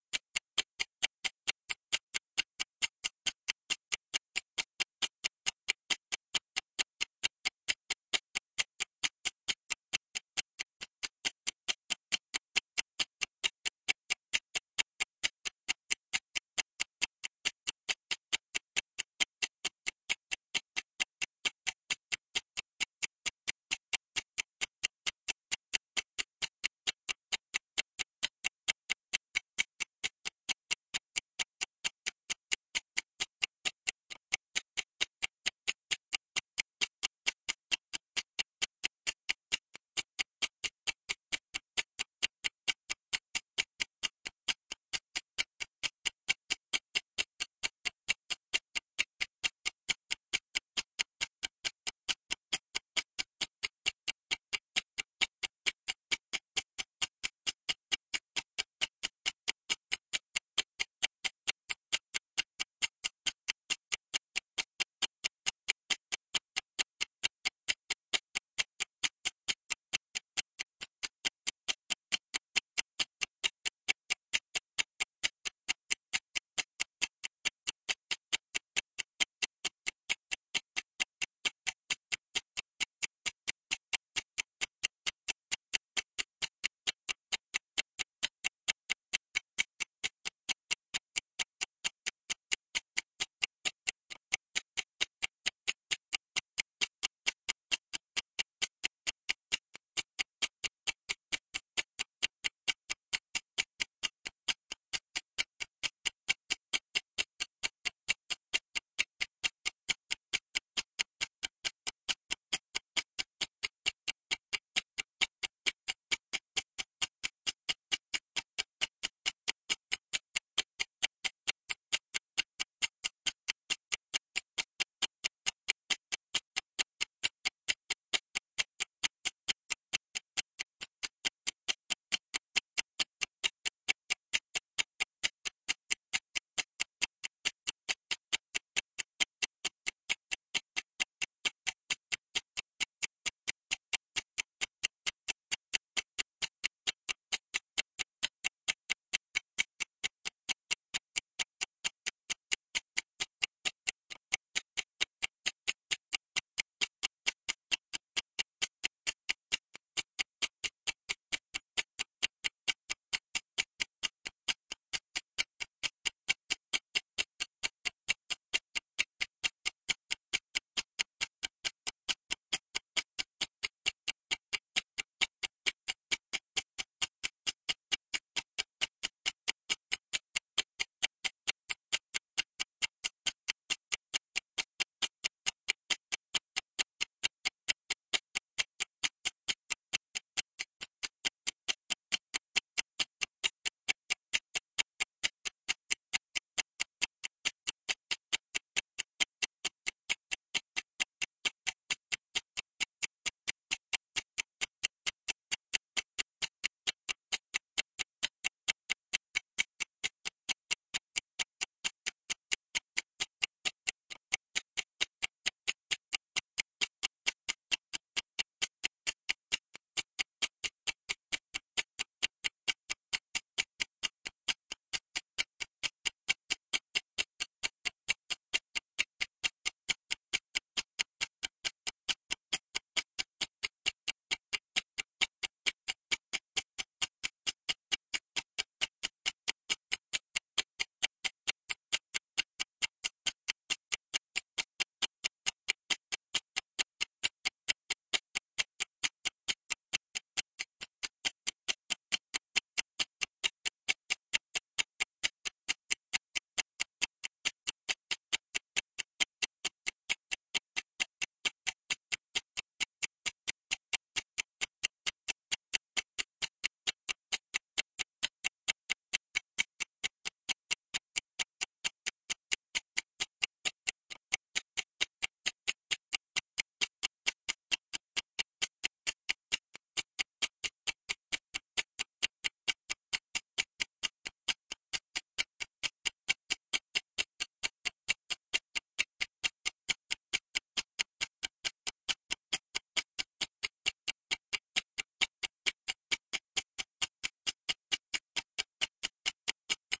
Ticking Timer
If you enjoyed the sound, please STAR, COMMENT, SPREAD THE WORD!🗣 It really helps!